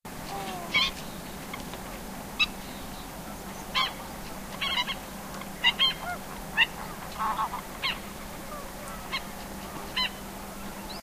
coot.waterfowl.marsh
waterfowl (coot) / focha
andalucia, birds, field-recording, nature, south-spain